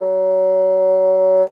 fagott classical wind
wind, classical